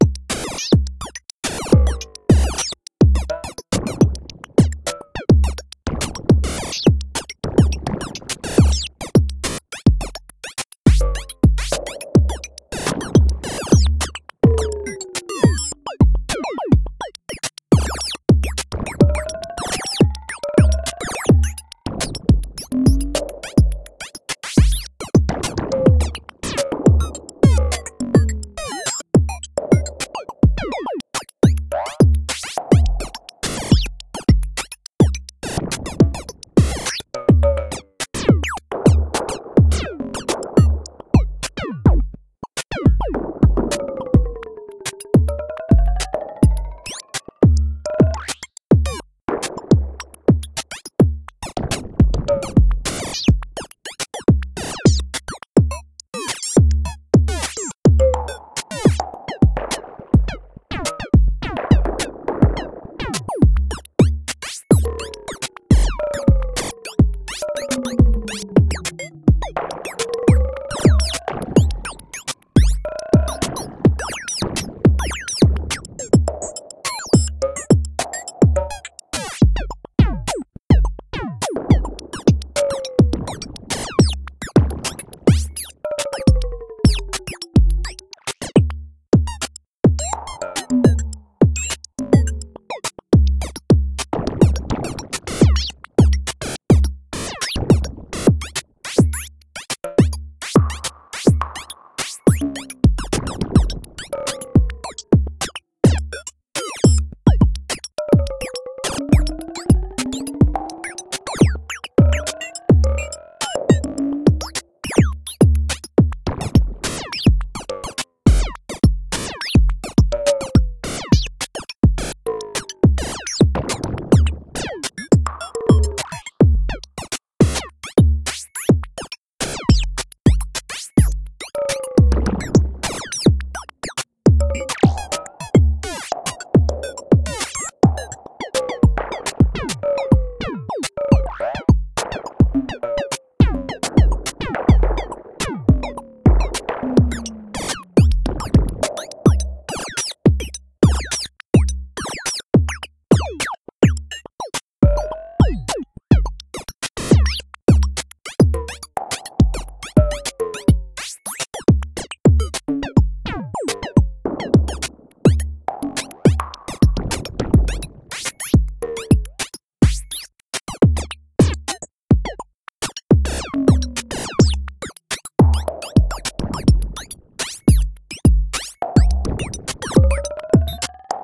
rock crushes scissors
beat, digital, electronic, fm, loop, modular, synth, synthesizer, weird